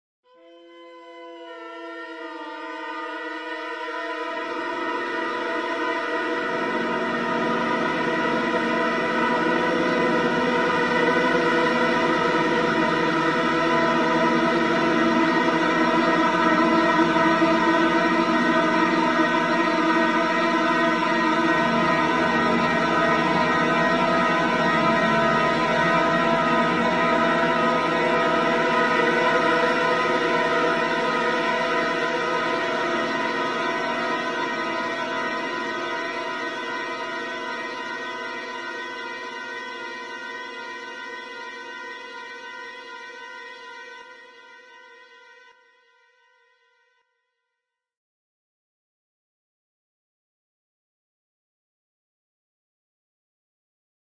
Horror Drone
A solo violin sample from Edirol Orchestral was used a base, using pitch bend and then layering against pitch shifted copies of the original. Then lots and lots and lots of reverb and maybe other effects. I spent way too long on this for no certain outcome but actually it was perfect for the radio drama I was theoretically producing it for.
atmosphere, creepy, dread, drone, fear, suspense, terror, threat